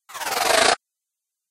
Scifi Production Element 1
A Sci-Fi sound effect. Perfect for app games and film design. Sony PCM-M10 recorder, Sonar X1 software.
effect, noise, fx, robotic, science-fiction, robot, sound, free, future, sfx, scifi, sf, futuristic, sounddesign, sound-design